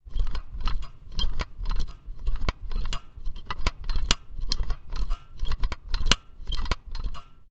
handle gear selection - free -1500 (transposition)
For this recording, I took an old recording of the inner mechanics of an ice cream scoop, and using Logic, manipulated the pitch (down 1500 cents) using "Free" mode.
repetition, gear, mechanical, MTC500-M002-s14, manipulated, ice-cream, noise, scoop, machine, squeeze